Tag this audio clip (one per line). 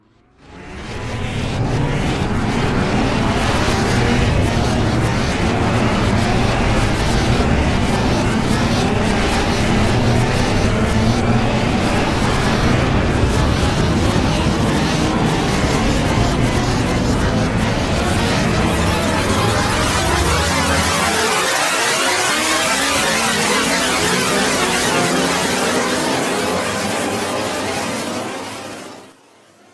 ambient
artificial
free
granular
sample
sound
stereo
synthesis